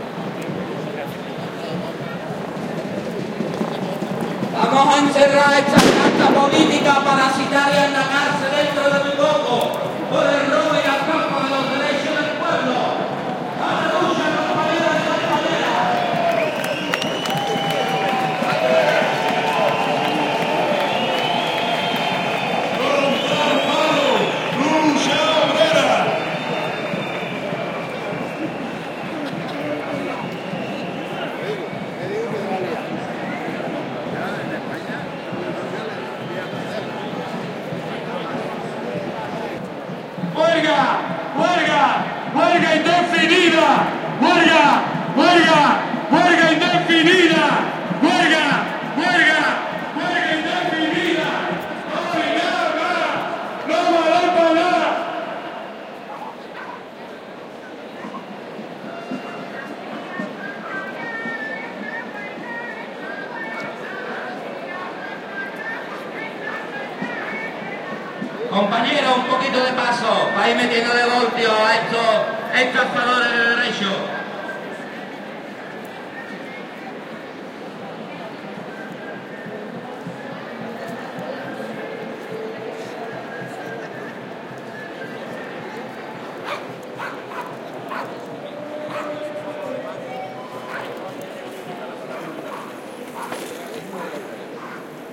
people shouting slogans against the government during a demonstration. Recorded in Seville on March 29th 2012, a day of general strike in Spain. Soundman OKM mic capsules into PCM M10 recorder
capitalism, crowd, field-recording, manifestation, people, protest, rights, slogan, spain, spanish, street, strike, worker